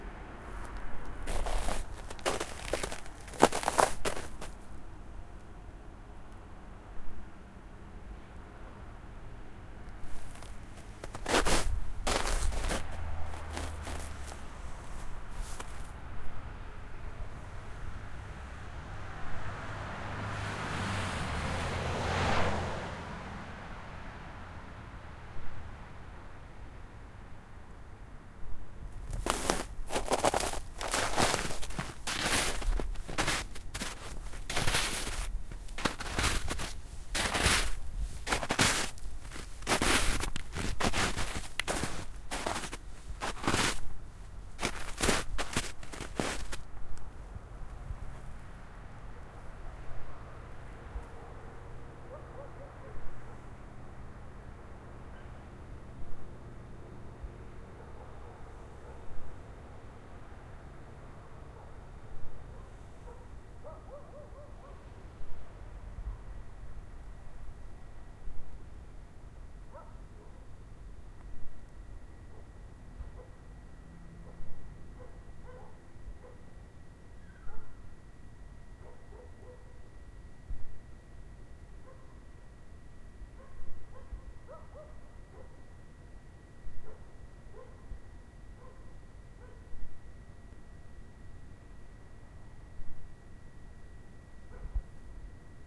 Walking on hard packed crunchy snow in Sand Springs, Oklahoma on a cold night in early January 2010. When not walking, a cool breeze blew and you can hear dogs barking in distance Recorded with Zoom H4